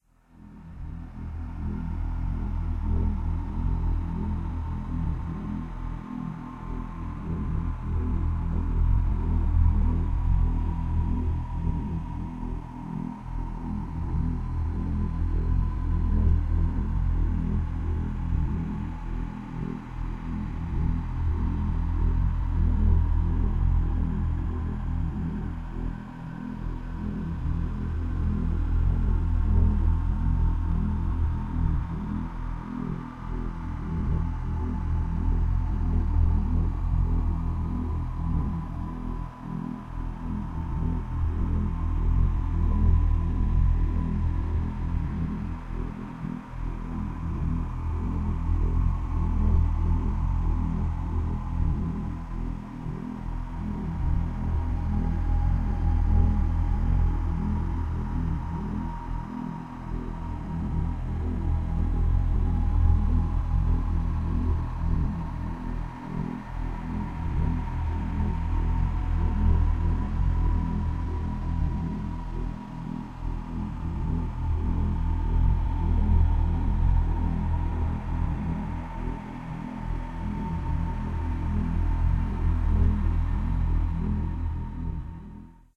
Undead Pulse
This one is the same as Dead Pulse, only that I added a scream-like ambient noise beneath it to add a bit of tension to the piece.
Atmosphere, Evil, Freaky, Halloween, Horror, Music, Scary, Soundtrack, Terror